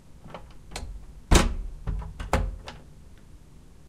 Door lock makes metallic noise on internal lock mechanism